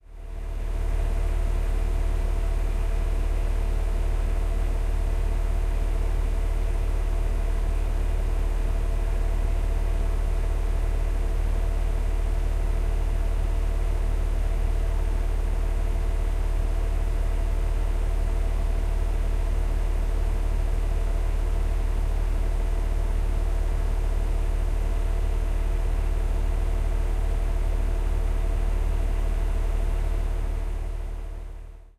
Droning noise of a heat pump fan.